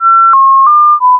Treard Justine 2014-2015 SON-SYNTHESE-3
ringing, tone, acute